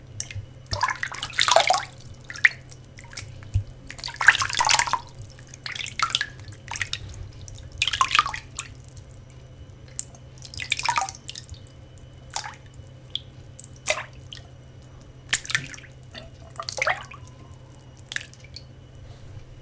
Recorded in my bathroom with B1 and Tubepre.
swash, water